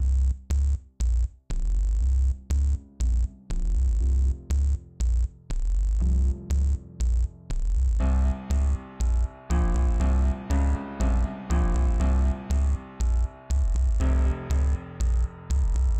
dramatic and minimalist theme with psychadelic salsa piano and some kind of sub dub bass
You can use the full version, just a piece of it or mix it up with 8 bar loopable chunks.
video, danger, game, electro, promise, music, bass, synth, loopable, pact, salsa, piano, loop, psychadelic, dramatic, drama, dub, phantom, sub, retro, theme, circus